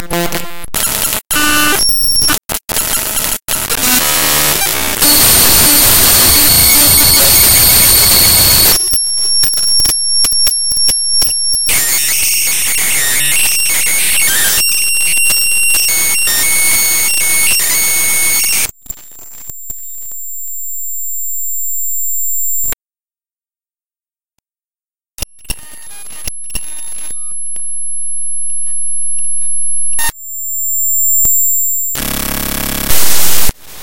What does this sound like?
importing a blender model i made into audacity using the import raw function.
(warning: contains high frequency noise)
buzzing, random